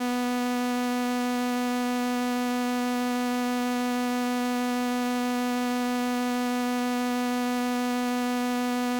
Transistor Organ Violin - B3
Sample of an old combo organ set to its "Violin" setting.
Recorded with a DI-Box and a RME Babyface using Cubase.
Have fun!
strings, electronic-organ, 70s, sample, combo-organ, transistor-organ, vintage